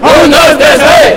shout 1,2,3, sabadell
Vocal energy sound saying the name of the club recorded after the practice with the whole basketball team.
sabadell, shout, team